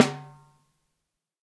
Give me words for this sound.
The loudest strike is also a rimshot. Microphones used were: AKG D202, Audio Technica ATM250, Audix D6, Beyer Dynamic M201, Electrovoice ND868, Electrovoice RE20, Josephson E22, Lawson FET47, Shure SM57 and Shure SM7B. The final microphone was the Josephson C720, a remarkable microphone of which only twenty were made to mark the Josephson company's 20th anniversary. Preamps were Amek throughout and all sources were recorded to Pro Tools through Frontier Design Group and Digidesign converters. Final edits were performed in Cool Edit Pro.